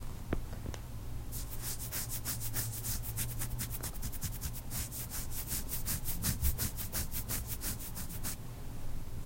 itching a scratch
recording of finger nails scratching skin.
skin, itch, scratch